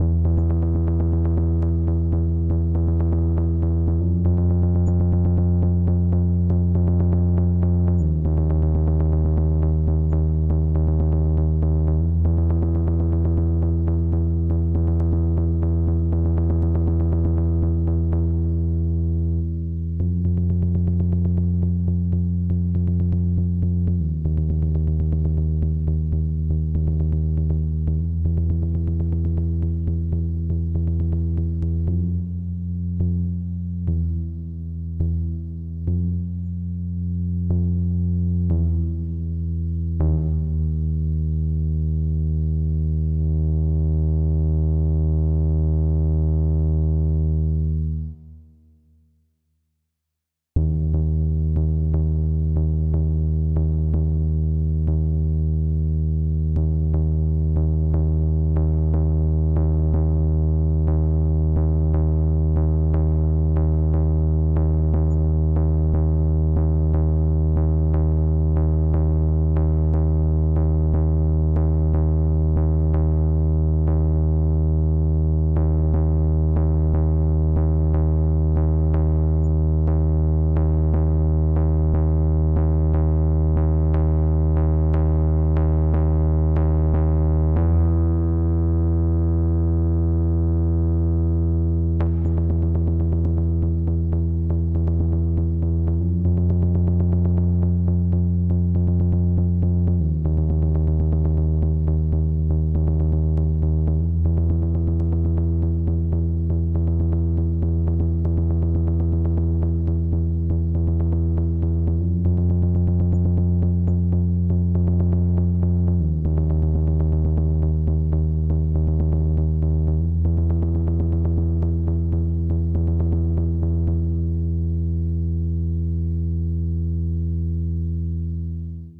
Punchy Bass Sequence i created for the "Schlummern" Sound to make a Track about a Sleeping Sequence ... The sound is Saturated and compressed. Created in Samplitude Music Studio and some internal fx. Also useful to add some Subbass because the richness and full sounding deep Frequency. Advice to add some Subbass and Punch it´s cool to use Highpassfilter with a strong Resonance at the Subbass Frequencys at 100- 150 Hz. The Filter begin to selfoscillate and no Eqing or only some is needed to make complete. I´ve used it with a Lowpass
Bass, Bassline